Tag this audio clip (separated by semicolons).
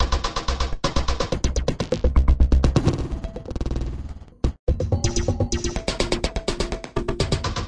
acid; breakbeat; drumloops; drums; electro; electronica; experimental; extreme; glitch; hardcore; idm; processed; rythms; sliced